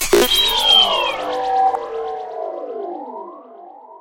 Small Robot P45 Tired
robot small star wars r2d2 tired low battery exhausted sci-fi synth laser space alien fiction science